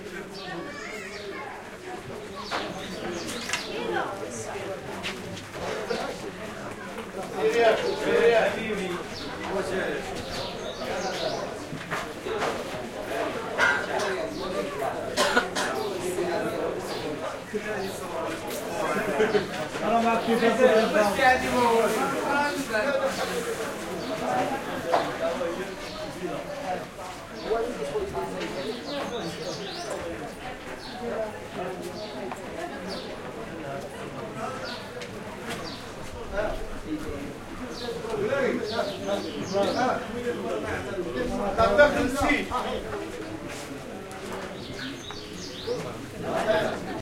market ext covered meat butchers voices arabic birds Casablanca, Morocco MS
arabic, market